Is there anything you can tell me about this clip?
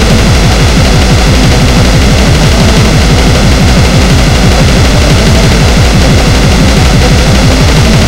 death metal loop
deathmetal loop made with fruityloopsLOL
blackmetal, death, deathmetal, metal